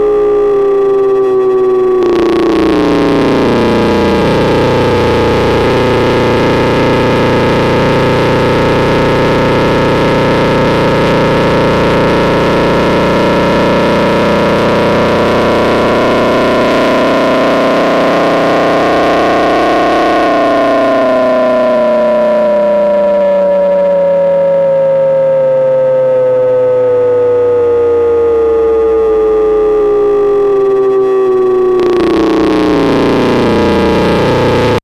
quantum radio snap056
Experimental QM synthesis resulting sound.